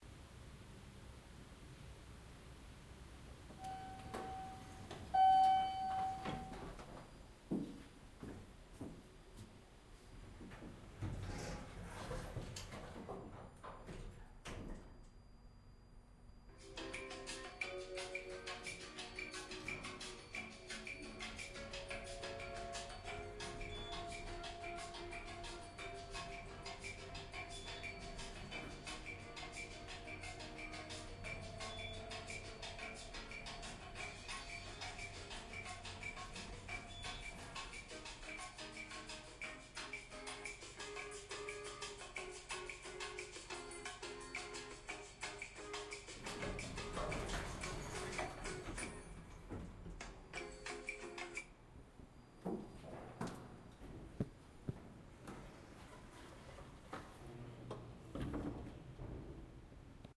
elevator music played in an elevator